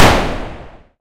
Single shot of a machine gun. Made with Audacity from scratch.